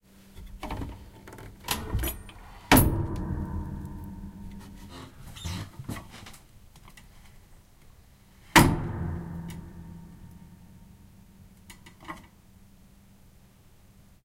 Attic Door Springs 4 (slammed)
The springs on this ceiling door were super metallic so I wanted to record them, sorry for any time I touch the mic!
zoom-h2n, springs, door, attic, resonant, wood, thump, foley, creak, metal, squeak, metallic, close